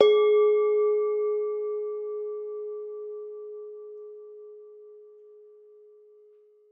mono bell -6 G# 6sec
Semi tuned bell tones. All tones are derived from one bell.